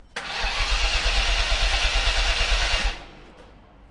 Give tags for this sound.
sports automobile vehicle engine ignition car

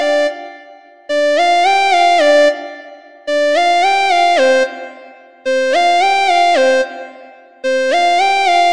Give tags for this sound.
110bpm synth

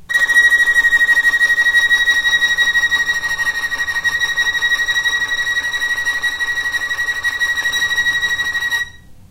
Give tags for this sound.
tremolo violin

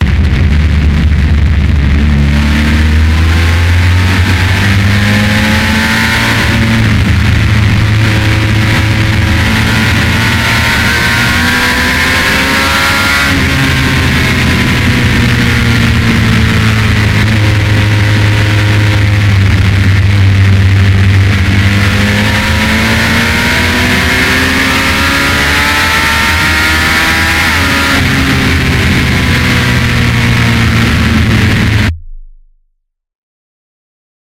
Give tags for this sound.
engine honda motor motorbike motorcycle vfr

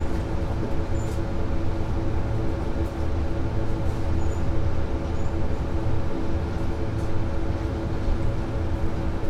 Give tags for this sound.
fridge generator machine motor power

